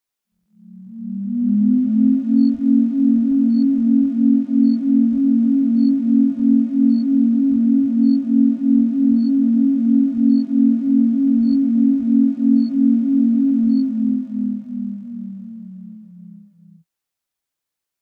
Sci-Fi sounding machine start up and power down. Made in Ableton using both operator and analog.